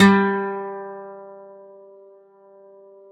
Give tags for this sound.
1-shot; acoustic; guitar; multisample; velocity

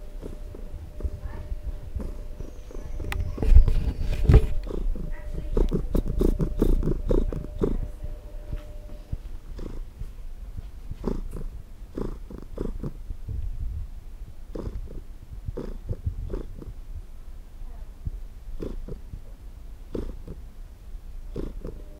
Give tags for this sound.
purring; feline; pet; happy; purr; cat

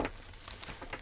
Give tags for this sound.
cellophane; clunk; crackle; crisp; crush; field-recording; machine

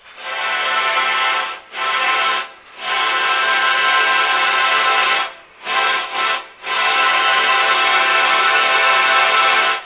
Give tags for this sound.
train; horns; engine; diesel; locomotive

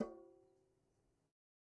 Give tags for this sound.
conga; drum; garage; god; home; kit; real; record; timbale; trash

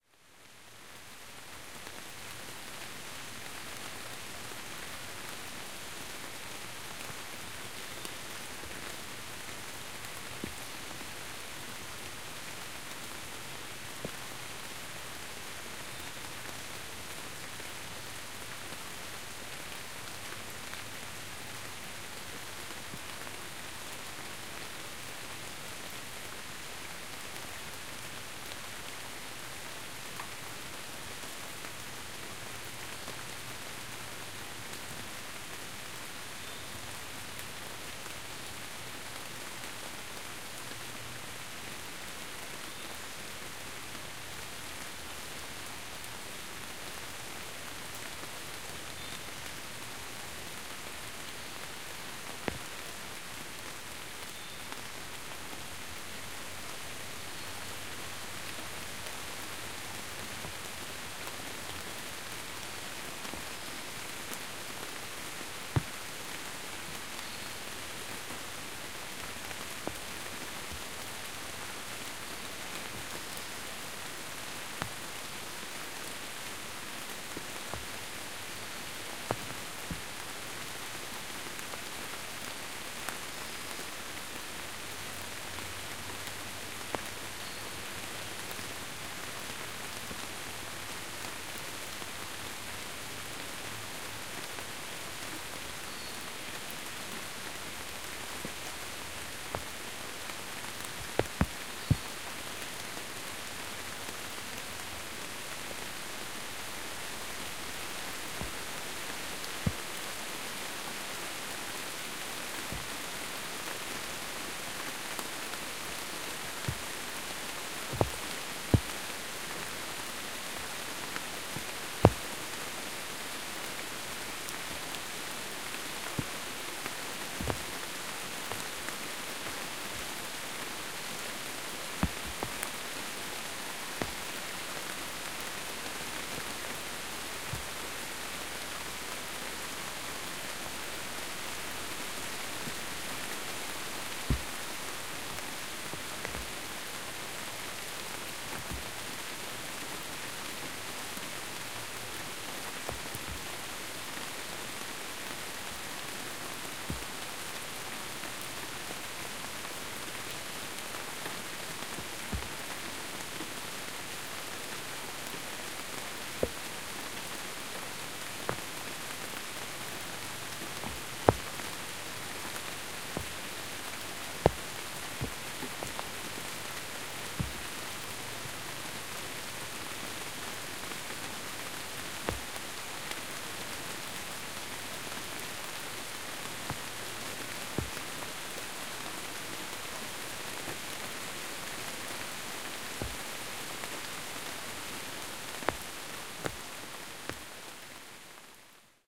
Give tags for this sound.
natural; rain; forest; nature

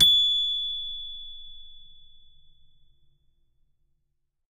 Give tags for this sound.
celeste; samples

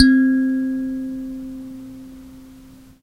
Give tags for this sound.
kalimba; singlenote; note; single; africa

